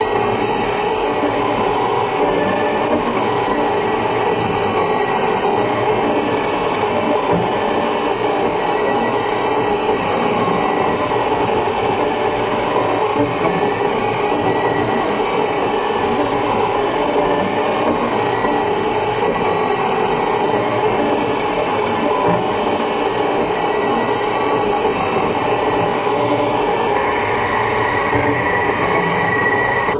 Digital Texture 02
Creepy sci-fi sound, perfect for a sci-f horror scene that needs a disconcerting sound effect to help sustain the shivers in the spine of the audience. hehe.
Josh Goulding, Experimental sound effects from melbourne australia.
creepy, electric, electronic, horror, sci-fi, soundbed